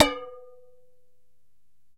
hit - peanut can 07
Striking an empty can of peanuts.
metallic, thunked, crashed, strike, banged, whacked, canister, crash, struck, empty